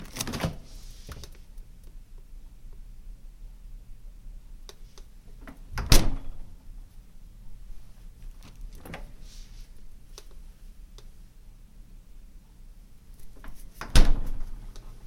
refrigerator door opening and closing
Opening and closing a fridge door.
refrigerator, close, closing, door, fridge, open, kitchen, hum, appliance, refrigerator-door, opening